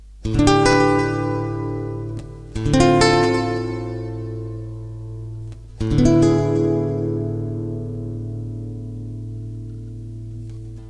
slow, dreamy, 7th chords played on a nylon string guitar.